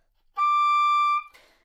Part of the Good-sounds dataset of monophonic instrumental sounds.
instrument::oboe
note::D
octave::6
midi note::74
good-sounds-id::8017